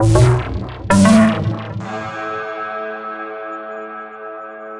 a layered loop using free softsynths